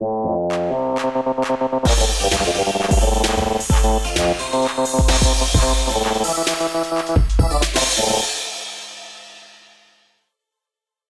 Someone in my classroom is making some parodic tv game based on the french "questions pour un champion". He asked me to make the jingle and here's the result.